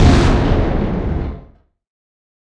Impact sfx 014
Impact effect,is perfect for cinematic uses,video games.
Effects recorded from the field.
Recording gear-Zoom h6 and microphone Oktava MK-012-01.
Cubase 10.5
Sampler Native instruments Kontakt 61
Native instruments Reaktor 6 synth
Audio editor-Wavosaur